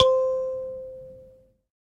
a sanza (or kalimba) multisampled with tiny metallic pieces that produce buzzs